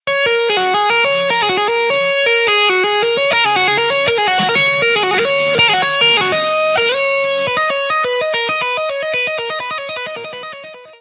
guitar tapping riff
A short guitar-tapping riff based on a melody by Edvard Grieg.
Electric-guitar, finger-tapping, Grieg